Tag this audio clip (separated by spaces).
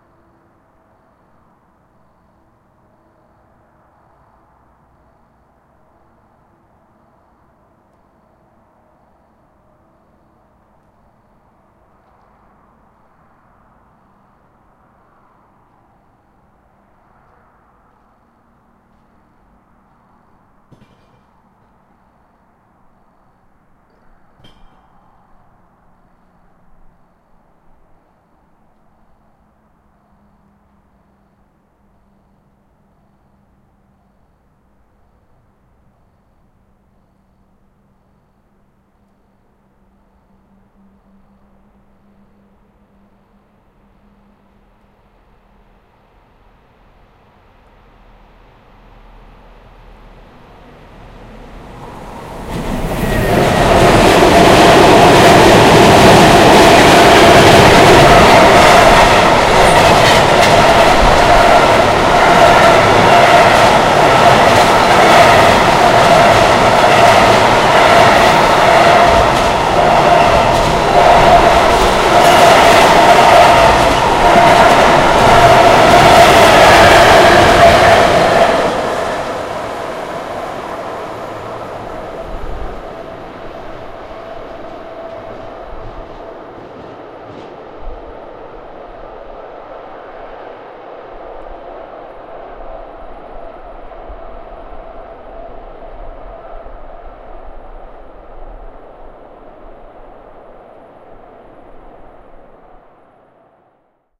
cargo-train fieldrecording noise Poland railway Torzym train